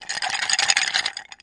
ice sounds5
ice cubes shaken in a glass jar... recorded with either an SM 57 or 58.
glass, cubes, shake, clink, ice, drink, rocks